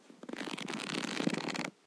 Book pages rustling